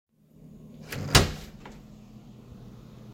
door open
knob, door-open, door, open